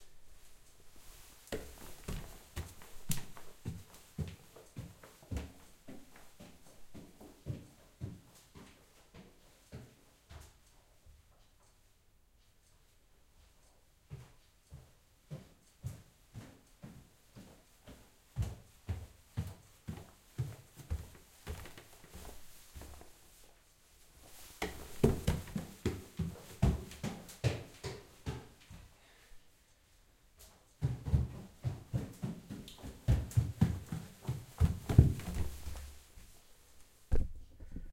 Walking with socks on wood
socks, walking